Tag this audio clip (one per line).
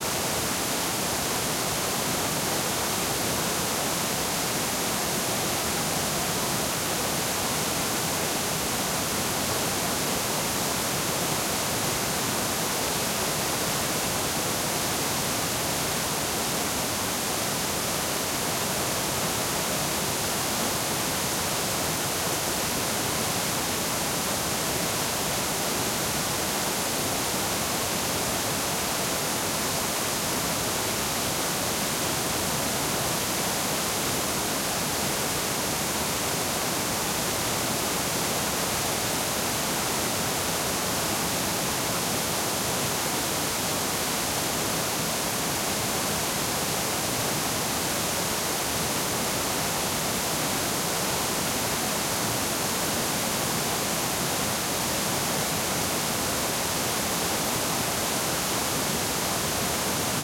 nature
water
noise
field-recording
waterfall